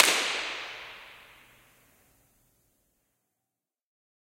3AUC IR COMBI PROCESSED 001
These samples were all recorded at Third Avenue United Church in Saskatoon, Saskatchewan, Canada on Sunday 16th September 2007. The occasion was a live recording of the Saskatoon Childrens' Choir at which we performed a few experiments. All sources were recorded through a Millennia Media HV-3D preamp directly to an Alesis HD24 hard disk multitrack.Impulse Responses were captured of the sanctuary, which is a fantastic sounding space. For want of a better source five examples were recorded using single handclaps. The raw impulse responses are divided between close mics (two Neumann TLM103s in ORTF configuration) and ambient (a single AKG C426B in A/B mode pointed toward the roof in the rear of the sanctuary).
avenue, choir, church, impulse, location-recording, processed, response, sanctuary, third, united